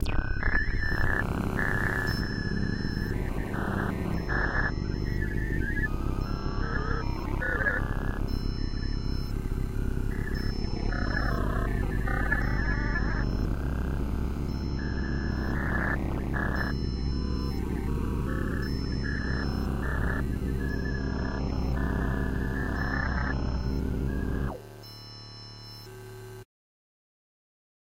Spaceship without a crew
the ghost in the machine.
designed with malstroem & z3ta+. No additional effects.
alien, computer, deep, drone, factory, industrial, science-fiction, soundscape, space